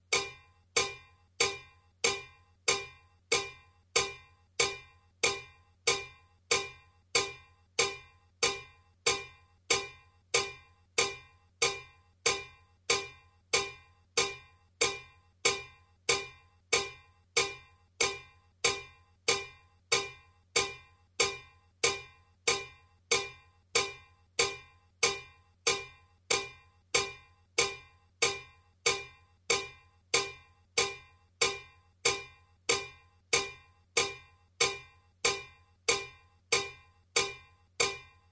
cowbell44time
My cowbell repeated to 4/4 time.
time, cowbell, 4